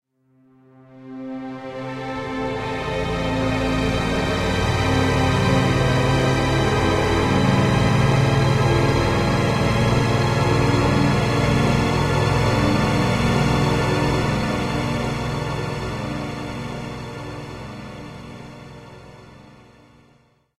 Strings with dark perverted secrets... Created with SampleTank XL and the Cinematic Collection.
disaster, dystophy, horror, madness, panorama, sceneario, shiver, shock, stinger